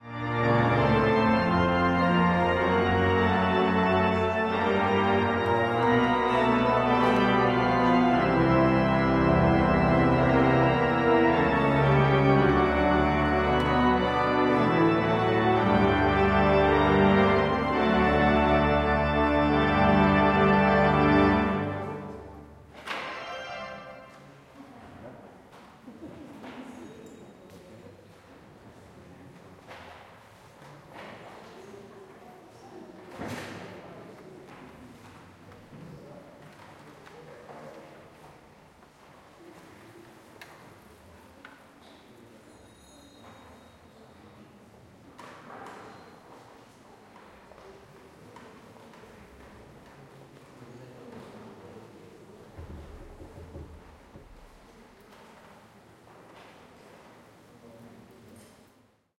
Organ in church
You hear the last part of the organ playing in the church of the monastery Madonna del Sasso.
Recorded in Ticino (Tessin), Switzerland.
congregation, field-recording, people, ambience, tourists, old, organ, fieldrecording, field, tourist, catholic, church, music